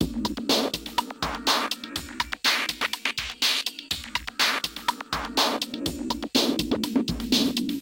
Remix Clap FX
A cool rhythm at 123 BPM.